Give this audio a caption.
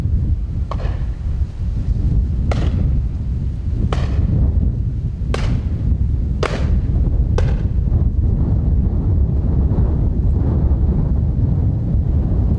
Several shots very windy conditions6
Several shots taken from a over-and-under shooter during Pheasant shoot in very windy conditions in a deep valley.
bang, discharge, fire, firing, gun, gunshot, over-and-under, pheasants, season, shoot, shooting, shot, shotgun, side-by-side, windy